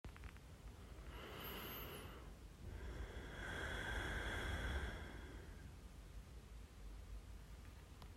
sound of inhale and exhale 1